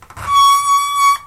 the sounds on this pack are different versions of the braking of my old bike. rubber over steel.